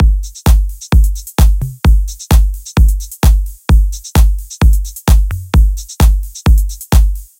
house drumloop 4
Genre: House
Tempo: 130 BPM
Drumloop
130, 4, beat, BPM, drum, french, house, kick, loop, snare